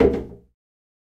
Putting a 1 liter half-full plastic bottle on a glass table.
Recorded with Zoom H2. Edited with Audacity.